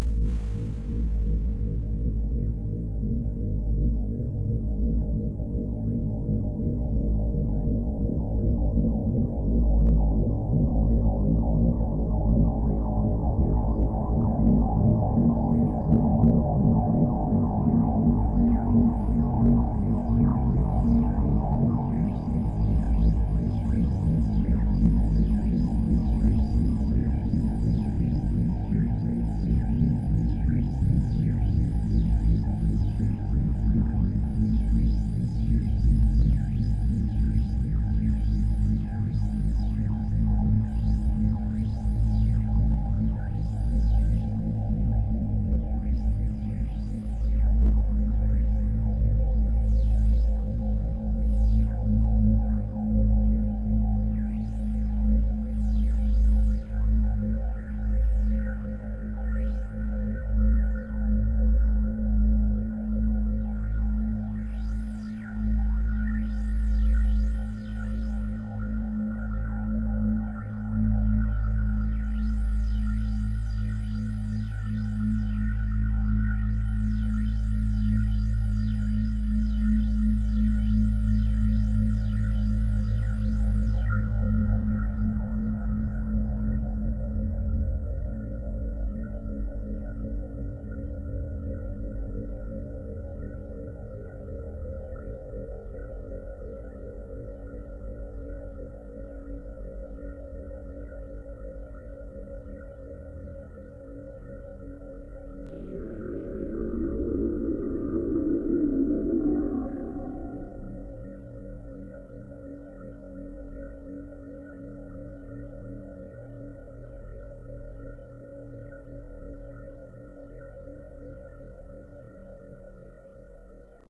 spirit of the flame
This is overlayed and depitched white noise with several choruses and flanges. Around the middle of the file the flanges are in very nice harmony and creates a very pure sound.